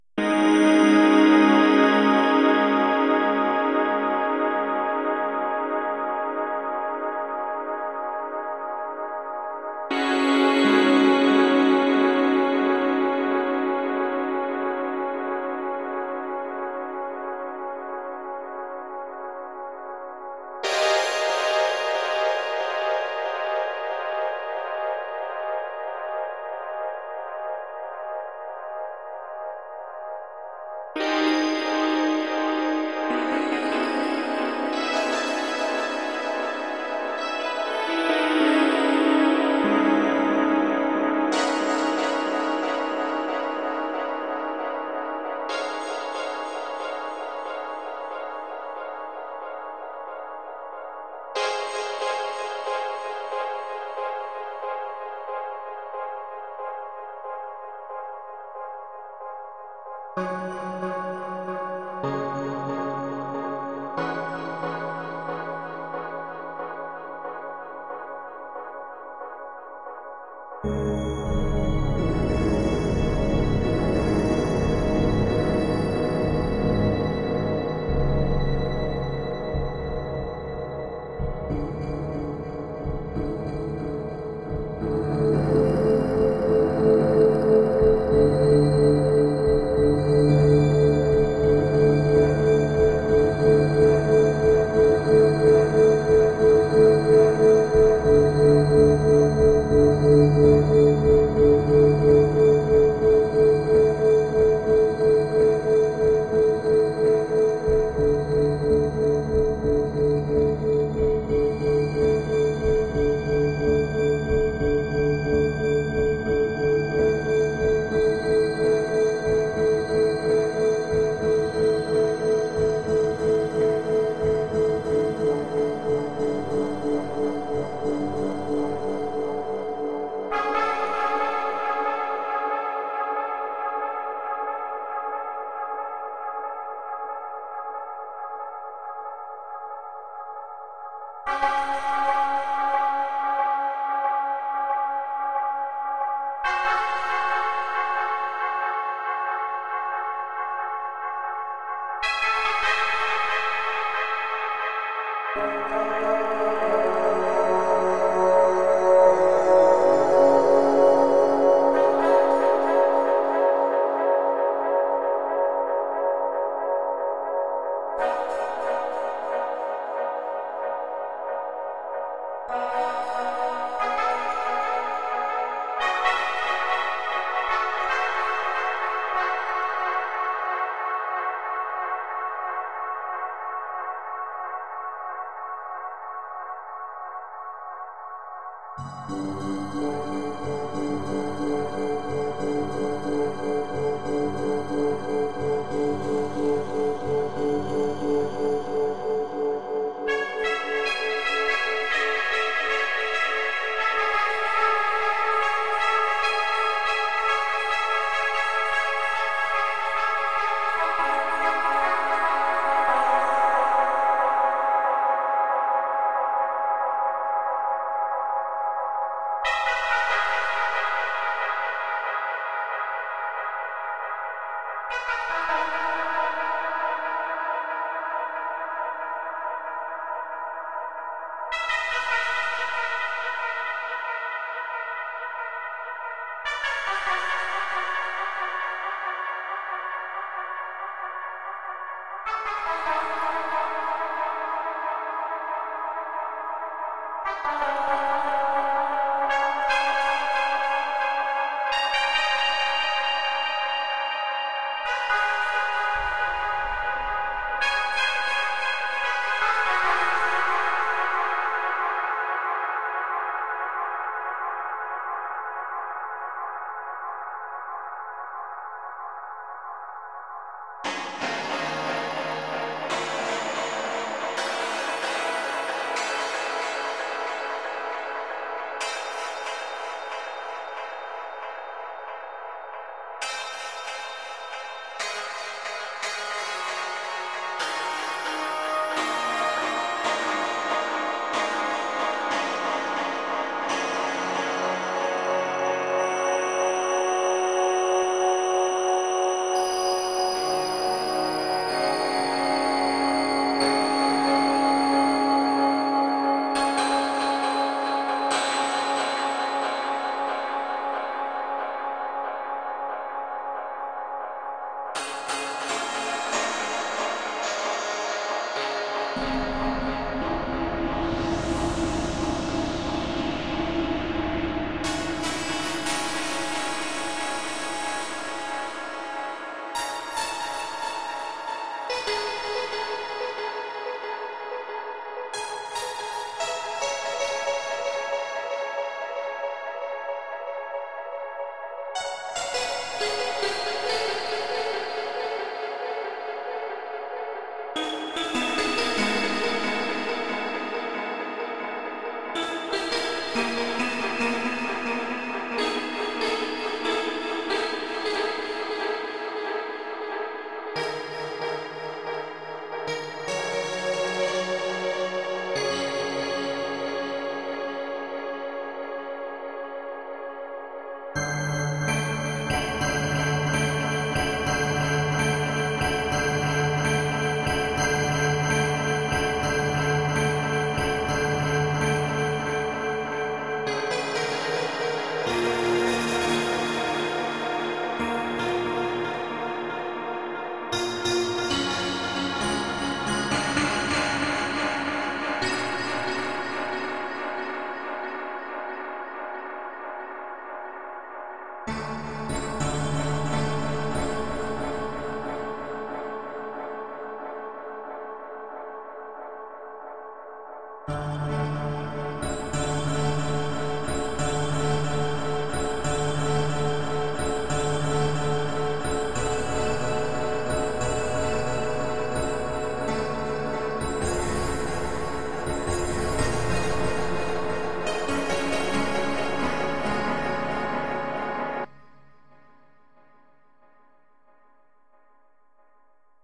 Session Three
Psychedelic (EXPERT MODE)
effect, psychedelic, rave, samples